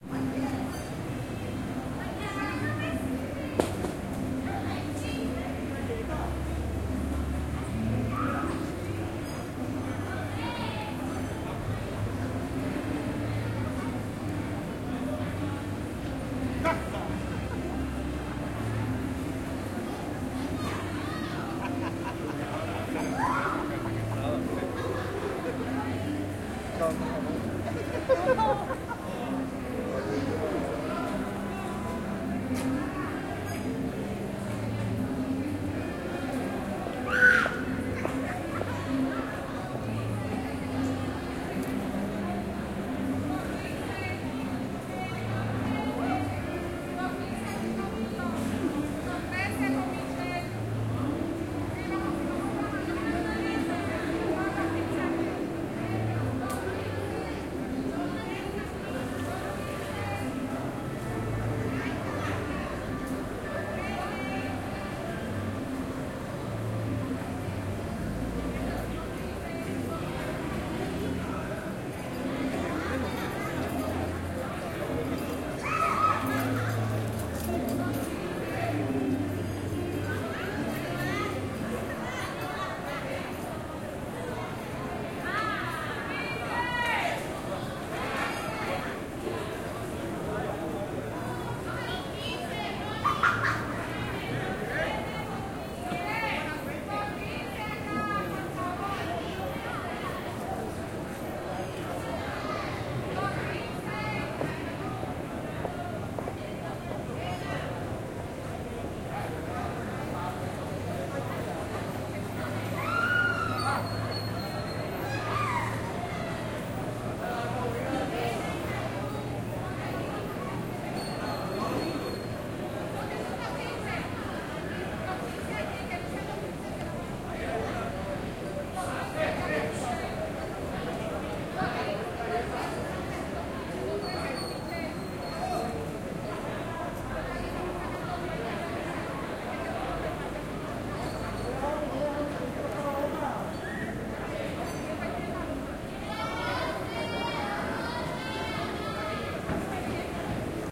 Ext, Old San Juan, Amb
Recorded 1/19/07 in Old San Juan, Puerto Rico.
exterior, san-juan, plaza-de-armas